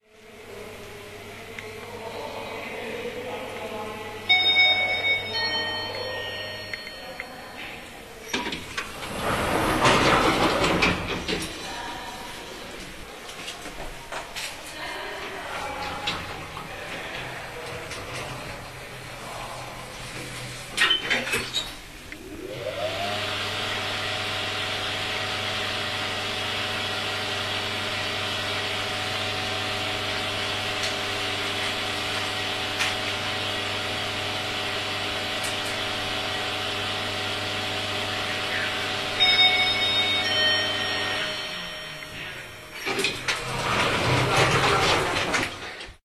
lift uam 100311
10.03.2011: about 17.40. lift ride up-down. Sw. Marcin street, building of Historical Department where I work.
ventiletion, air-conditioning, lift, machine, field-recording, fan